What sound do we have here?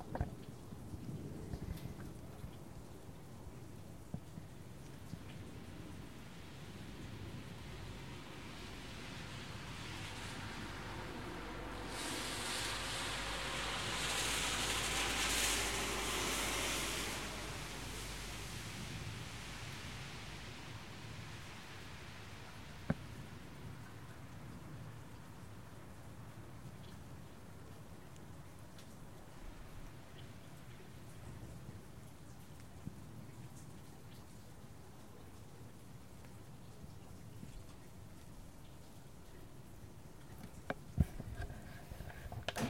rainy night and cars
Rainy night in Belgium with a car passing by. Minor parasite sounds
raining, rain, raindrops, car, night